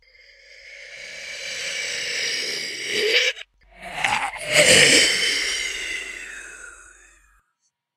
Demon Dying 1
A simple sound of a demon or paranormal creature dying or choking.
expire, die, choke, scary, horror, paranormal, ghostly, spectre, ghost, creepy, choking, evil, dying, demon, nightmare, devil, hiccup, spooky